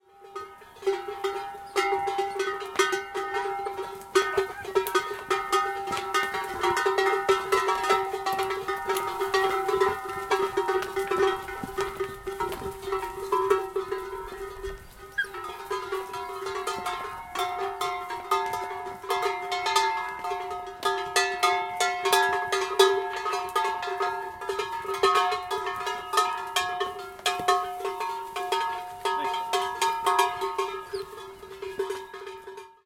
Cows with cowbell 2
Ambience of cows in Benasque Valley with cowbells